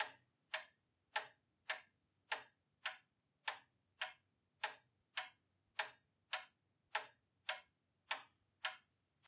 An old antique wall clock that hangs in the living room at my father-in-law.
Recording machine Zoom F4
Microphone 2 Line-audio CM3
software Wavelab
plug-in Steinberg StudioEQ
tic, field-recording, Wavelab, tac, line, antique-wall-clock